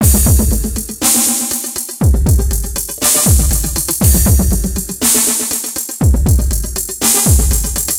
120 Metallic Beat 04
4 bar Glitch/IDM drum loop. 120 BPM.
120-BPM, Beat, Drums, Electronica, Glitch, IDM, Loop